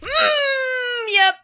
got startled again and lost
growl, yep